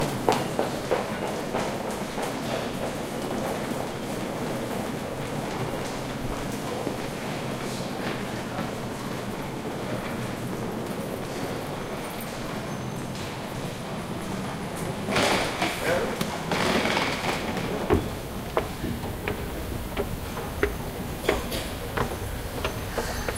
Japan Tokyo Station More Footsteps and Noises
One of the many field-recordings I made in and around train (metro) stations, on the platforms, and in moving trains, around Tokyo and Chiba prefectures.
October 2016.
Please browse this pack to listen to more recordings.